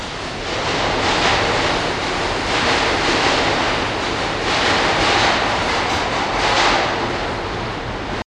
The subway as a train passes under as heard from the grate on the street on 5th Aveneue in New York City recorded with DS-40 and edited in Wavosaur.